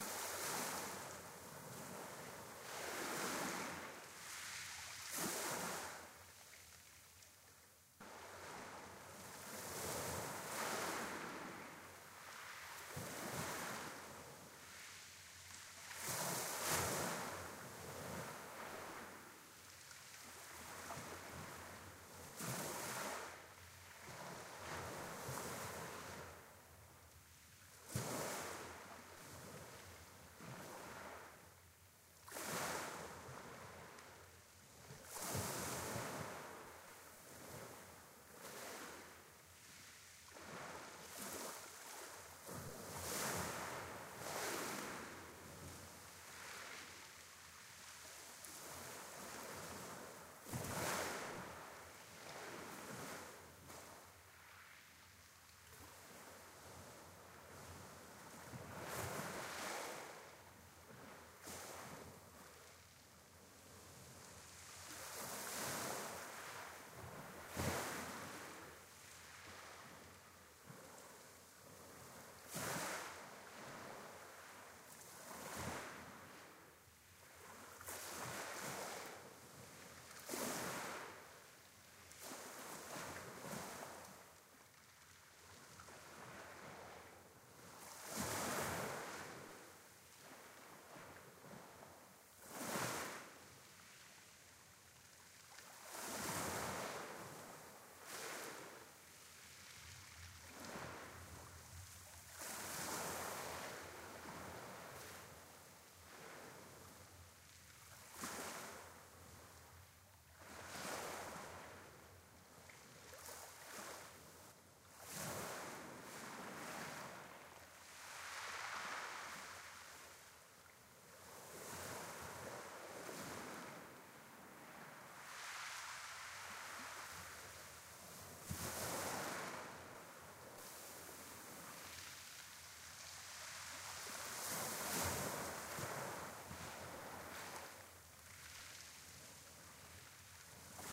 wave rocks canada creek processed
Recorded using a handheld digital recorder in Canada Creek on the western shore of the Bay of Fundy in July 2009. Sound can be enjoyed in its current form or cut up to sample sections.